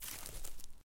Left Grass/Grassy Footstep 2
A footstep (left foot) on a dry grassy surface. Originally recorded these for a University project, but thought they could be of some use to someone.
foot-step, step, field, footsteps, footstep, foot, Dry-grass, grassy, walk, steps, crisp, left-foot, walking, grass, bracken, feet